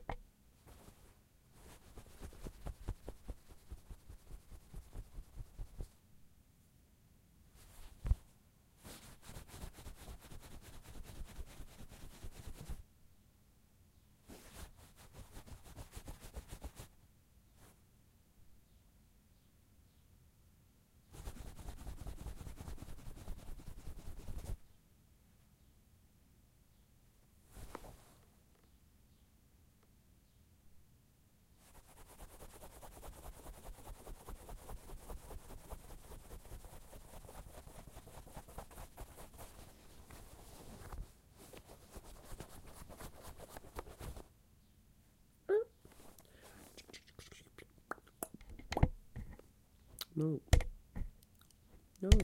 leg twitching
H4n recording of legs nervously twitching under a table at a constant pace.
Originally recorded for the web series "Office Problems".
clothes, foley, leg, legs, nervous, quick, quickly, twitch, twitching